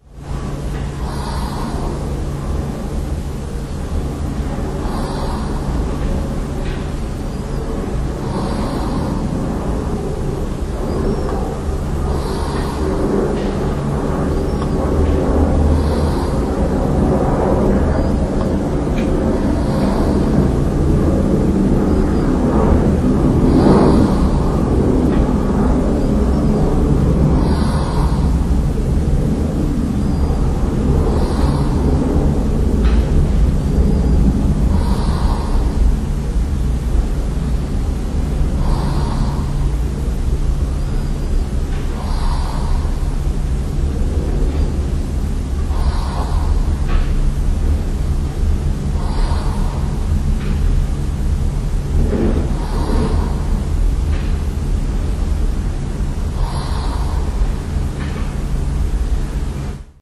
An Airplane that left Amsterdam Airport Schiphol a short while ago, passes me sleeping. I haven't heard it but my Olympus WS-100 registered it because I didn't switch it off when I fell asleep.